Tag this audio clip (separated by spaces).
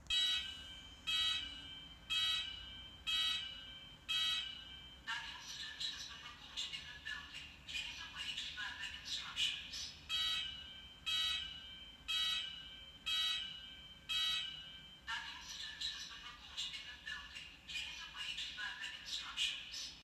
Fire-alarm Alarm Field-recording